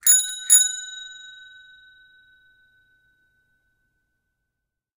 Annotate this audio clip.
Bike bell 06
Bicycle bell recorded with an Oktava MK 012-01